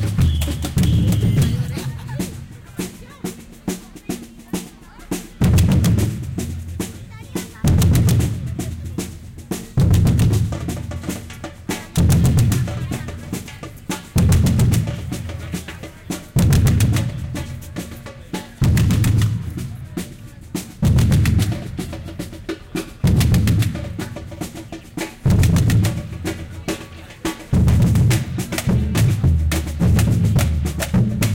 a group of drummers in a street performance in Plaza Nueva, Seville, Spain. Equalized this sample to enhance sound